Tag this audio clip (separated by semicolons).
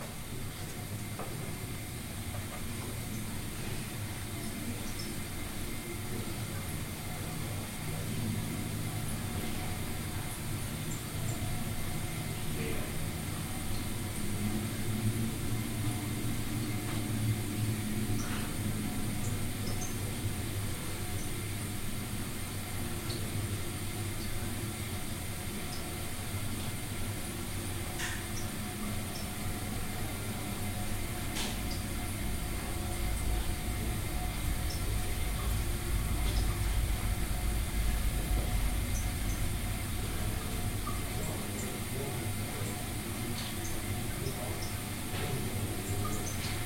room; indoors; wc; bathroom; tone; ambient